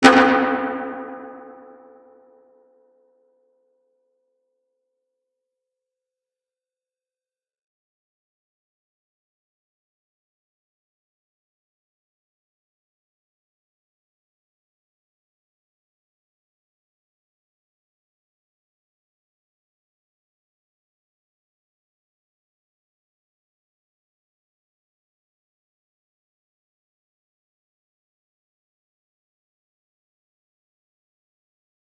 A dub hit processed with a Roland Space Echo